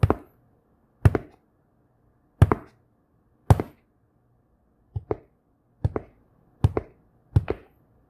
a total of eight footsteps- 4 with some impact and 4 softer ones. use how you want.
concrete, foot, footsteps, hard, surface